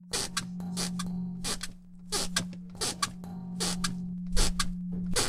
LEGUERN Tracy 2015 2016 Lugubriousatmosphere
A haunted host with fragile fondations. The wind causes scary noises and the atmosphere testify to past of house
Generate 1 sound : Sinusoïde
Recording 2 sounds : creaking and shock
Delate the noise of microphone
Repetition and superposition of sounds
Play with tempo (slowdown), speed(decrease)
Modification of shell
> Creation of slow and disturbing atmosphere.
spirit, anxiety, suspens, grinding, gloumy, haunted-host